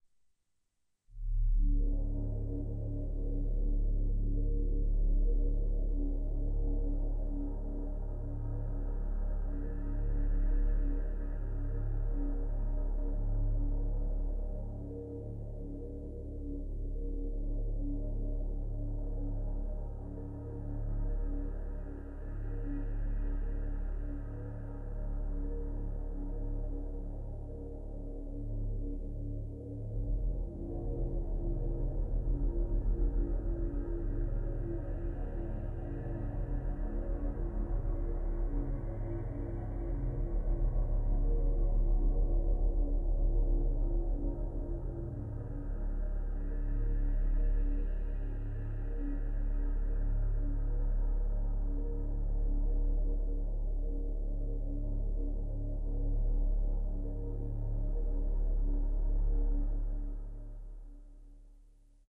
horror sound #4
Short horror sound to add tension to a project. Created with a syntheziser and recorded with MagiX studio.